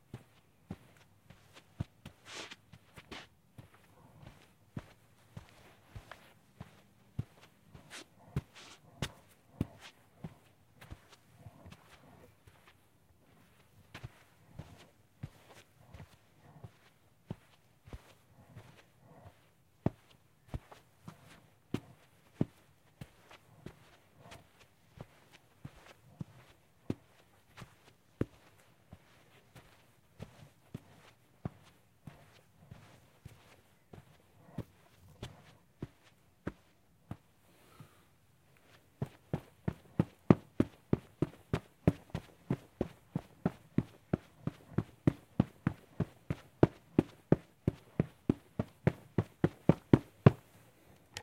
light footsteps on carpet walk
Sounds of walking on a carpet